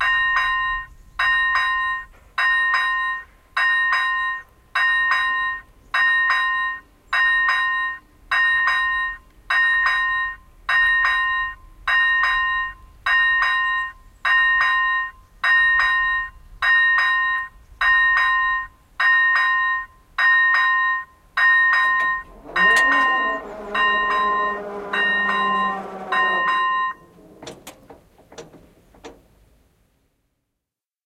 závory na přejezdu
automatic railway gate, closing
brailway, closing, railroad